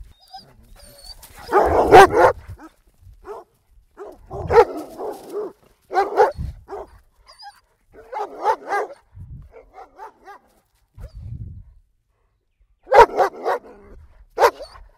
DOGS-Large-1-(14sec Loop)
recording, Hz, Mastered, Large, field, Dogs, Loop